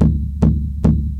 short rhytm and drum bits. Good to have in your toolbox.
lumps, toolbox, fragments, bits, music